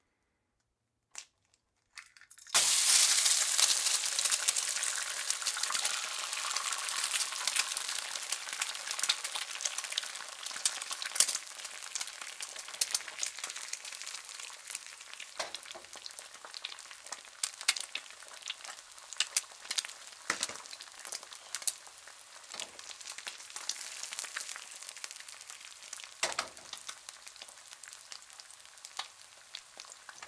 An egg is cracked and put into a pan of burning hot oil.
eggs, cooking